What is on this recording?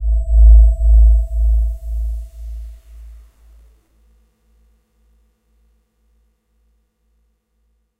Not that is was that important after all considering the fact that the patch itself has a grainy character in the higher frequencies... No compressing, equalizing whatsoever involved, the panning is pretty wide tho, with left and right sounding rather different, but in stereo it still feels pretty balanced i think.
space, bass, synthetic